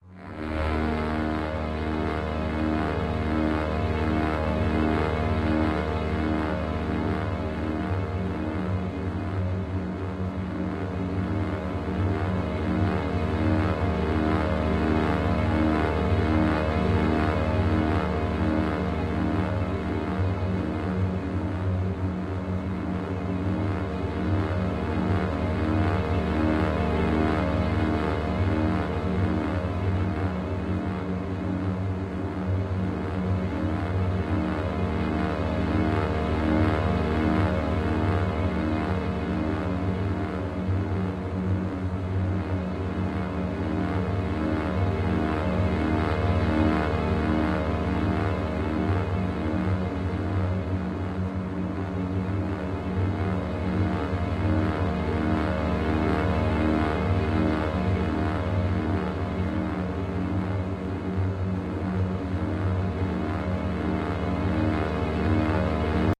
Angry Drone 1
An ambient track that sounds a bit angry. Can be cut down to fit whatever length needed, and is simple enough that a looping point could likely be found fairly easily if needed longer.
ambiance, Ambience, atmosphere, background, cinematic, creepy, dark, digital, drone, electronic, haunted, horror, melodic, music, musical, sinister, soundtrack, spooky, stab, sting, strings, suspense, synth, synthesized, synthesizer, tremolo